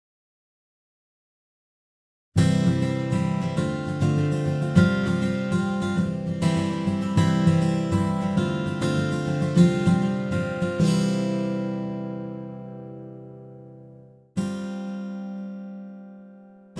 Folk Guitar chords on key of C Major - 100-BPM